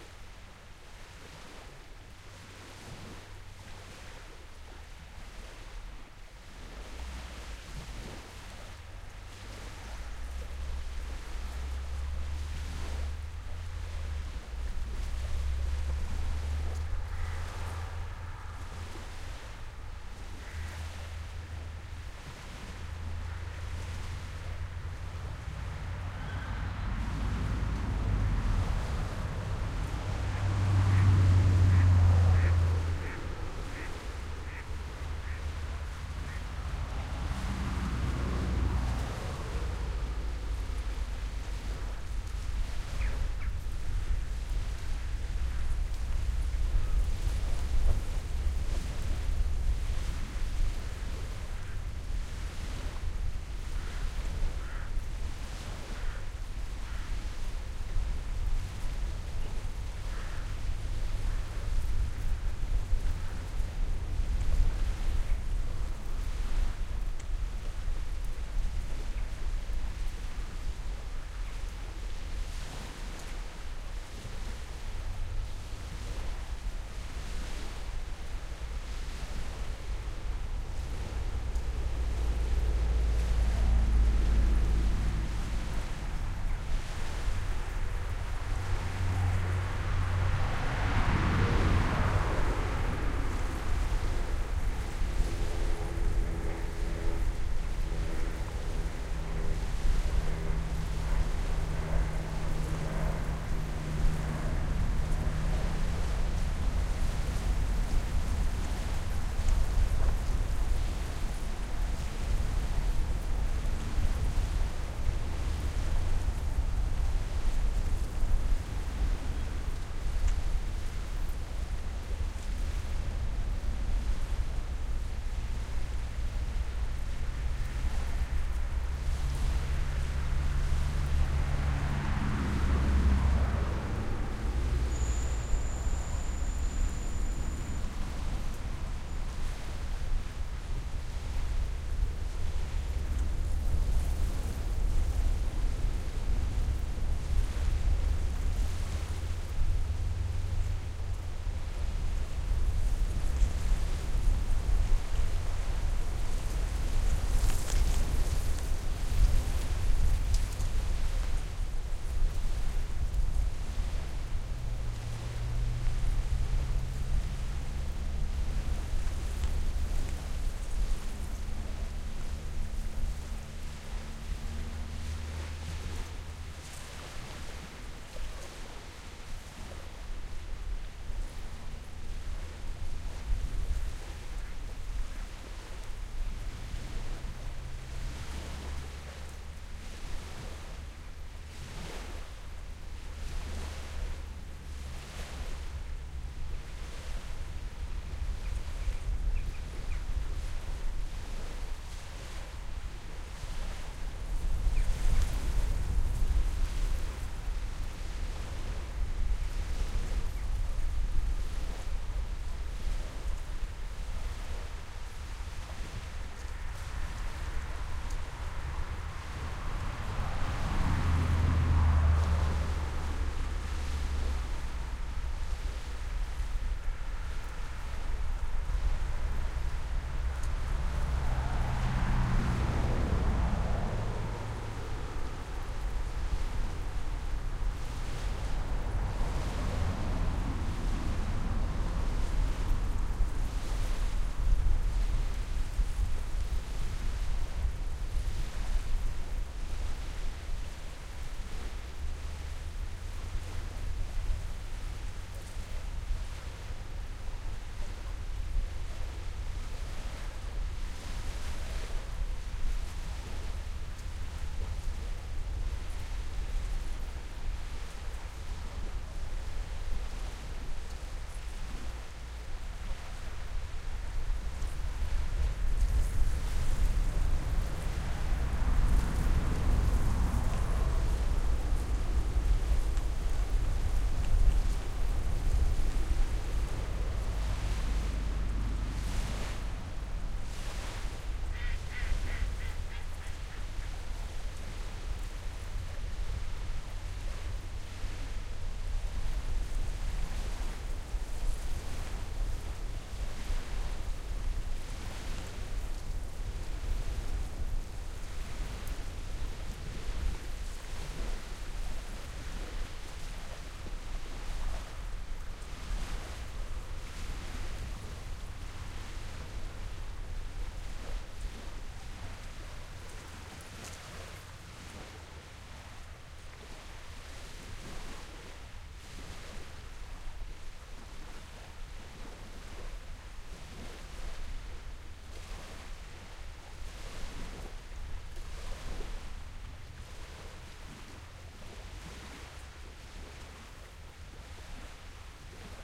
And this was a really windy day at the shore of Loch Tay in Highland Perthshire. I put some small microphones in a Rycote windshield and hung them in a bush to record the waves and the wind with a Sony HiMD MiniDisc Recorder MZ-NH 1 in the PCM mode.